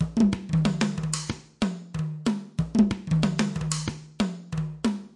ethnic beat1
congas, ethnic drums, grooves
percussion, percussive, drums, grooves, congas